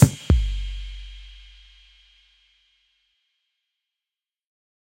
l4dsong loop end
End (or break) for drumloop